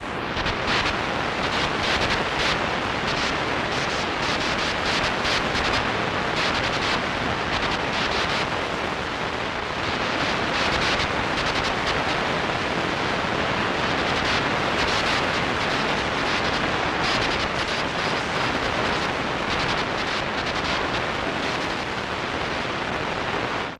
Radio Noise 4
Some various interference and things I received with a shortwave radio.
Noise, Interference, Radio-Static, Radio